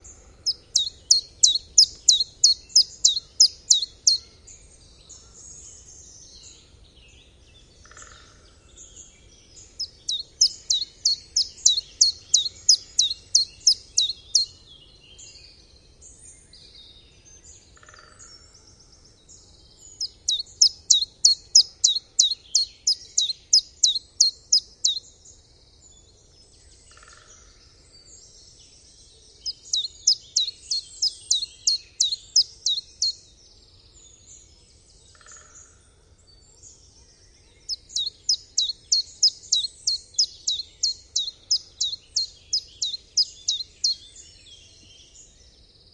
Singing chiffchaff [Phylloscopus collybita] on an early morning in a forest near Cologne.
Vivanco EM35 into Marantz PMD671.
ambient, bird, birdsong, field-recording, forest, morning, nature